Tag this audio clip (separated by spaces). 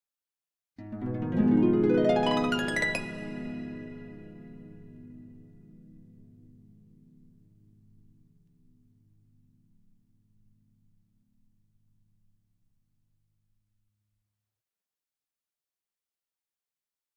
Glissando Up Beginning Dream Harp